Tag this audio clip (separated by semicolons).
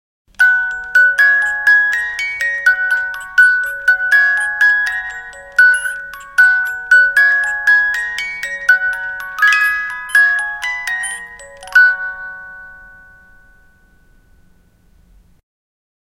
chime; pop-goes-the-weasel; music-box